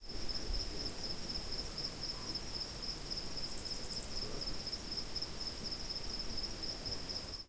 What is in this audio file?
Some insects in Tanzania recorded on DAT (Tascam DAP-1) with a Sennheiser ME66 by G de Courtivron.